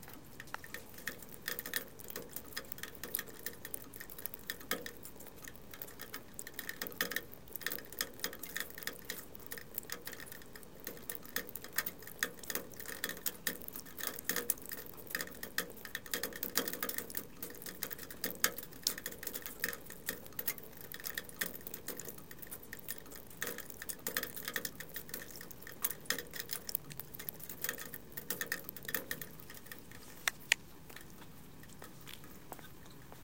water dripping from gutter to ground
Rain water dripping from the gutter to the ground. 11:00 pm.